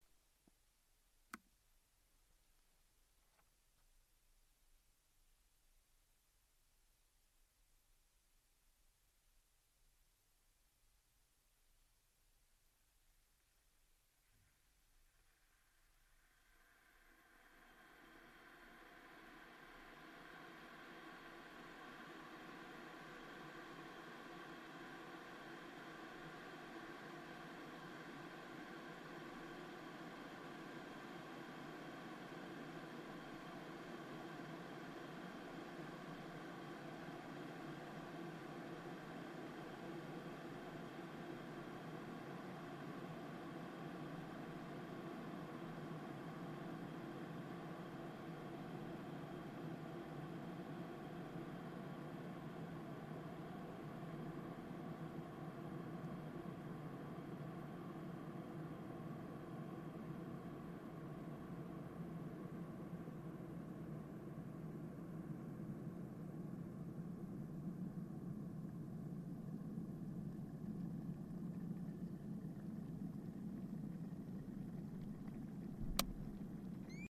kettle D mon semi anechoic
Recording of a kettle from turning on to boiling. Recording in semi-anechoic chamber at University of Salford. Pack contains 10 kettles.
anechoic, high-quality, kettle